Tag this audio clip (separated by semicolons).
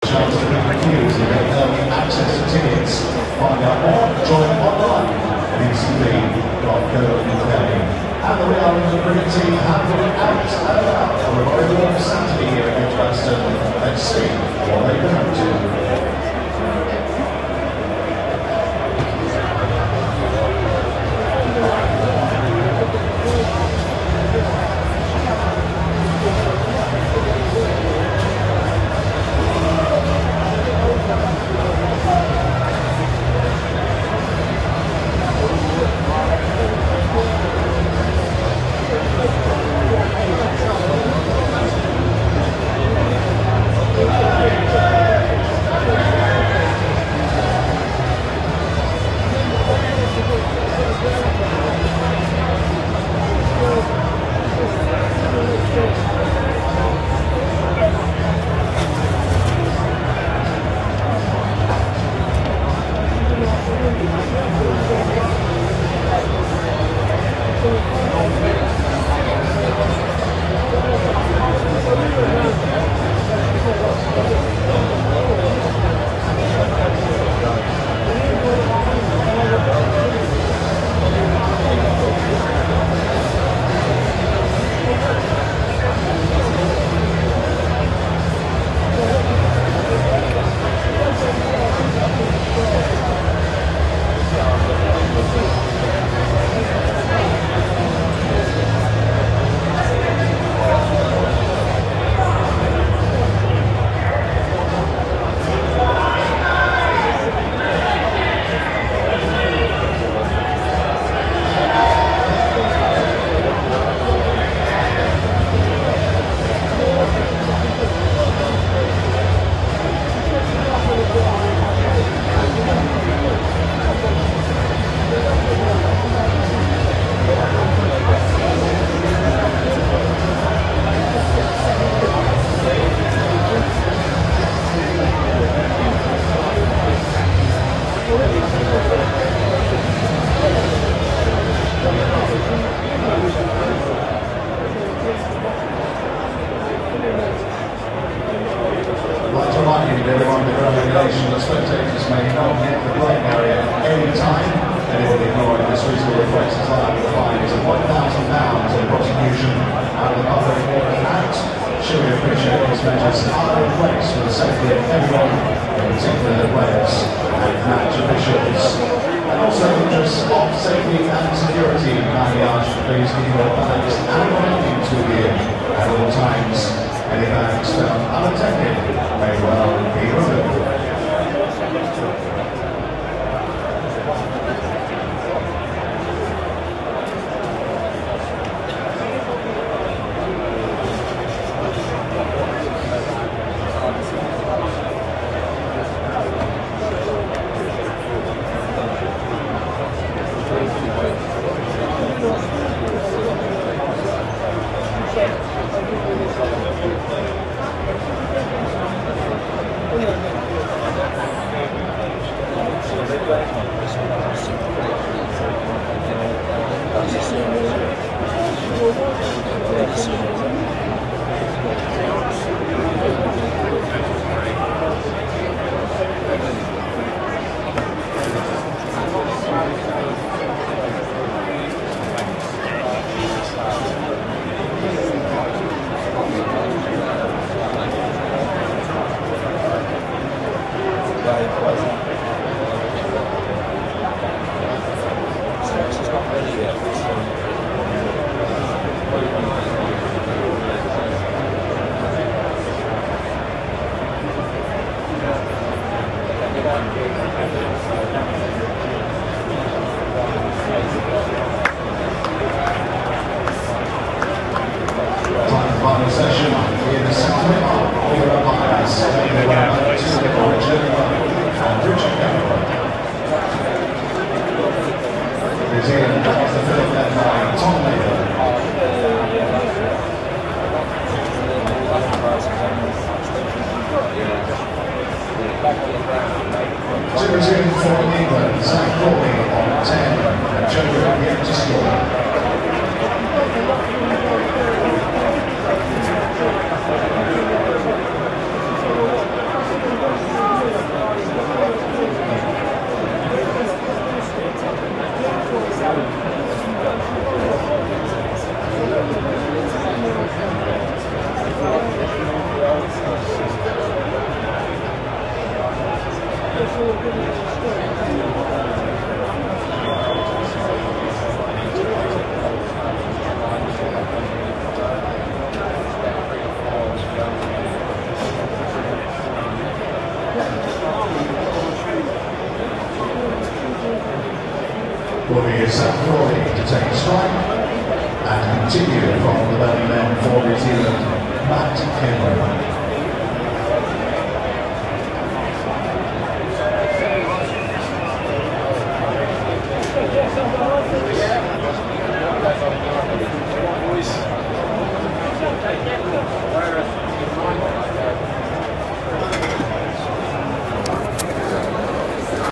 ambience; announcement; audience; cricket; crowd; sport; tannoy